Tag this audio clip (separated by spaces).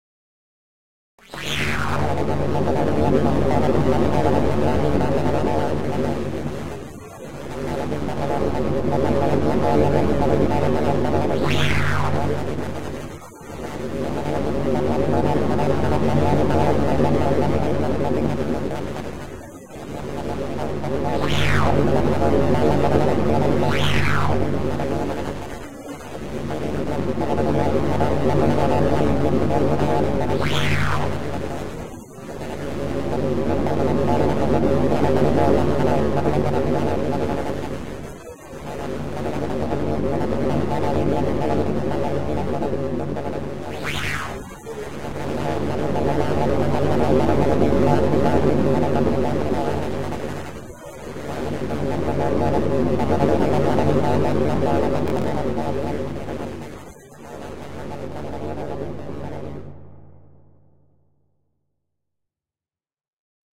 Machine Machinery Mechanical Sci-fi Synthetic